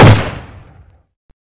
One of 10 layered gunshots in this pack.